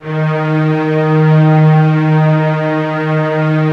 06-synSTRINGS90s-¬SW
synth string ensemble multisample in 4ths made on reason (2.5)
d, 1, multisample, synth, strings